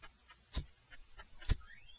Seeded poppy pods shaken in front of noisy built in stereo mics in a laptop. The same laptop that decided not to recharge and upload 65 files last night because I closed the lid because it was too bright. Next sound will be laptop thrown off multi story parking garage.